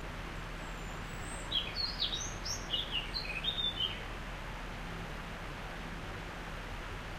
Taken on a Nikon Coolpix p520 in video setting. In a Scottish Glen while walking by a stream to a waterfall.